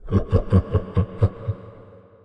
Evil Laugh 4
Sound of a man laughing with Reverb, useful for horror ambiance
ambiance, creepy, drama, evil, fear, fearful, haunted, horror, laugh, phantom, scary, sinister, spooky, suspense, terror